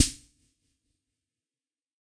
Raw sample of isolated drum sound without any alteration nor normalization.